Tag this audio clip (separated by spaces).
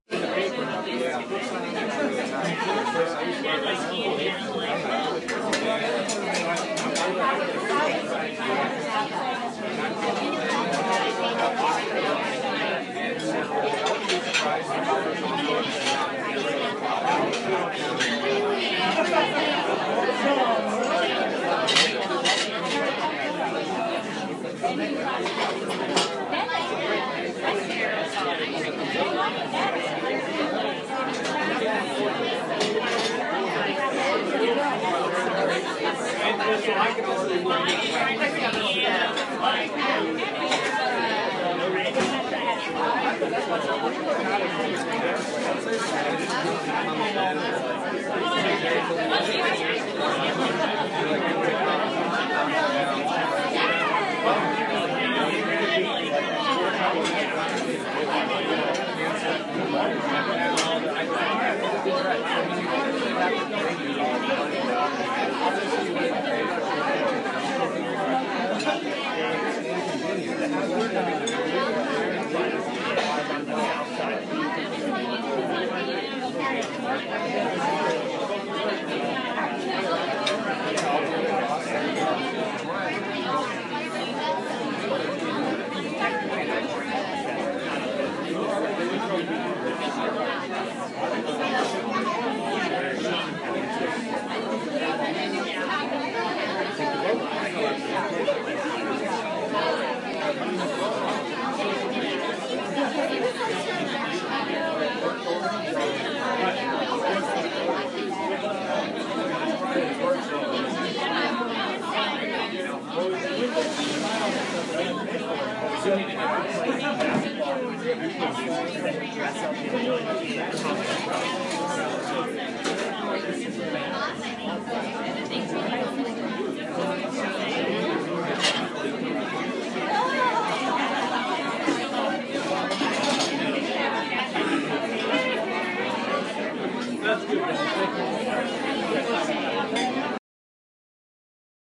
ambiance ambience ambient atmo atmos background background-sound busy chatting crowded dinner field-recording people plates restaurant restaurant-ambience soundscape talking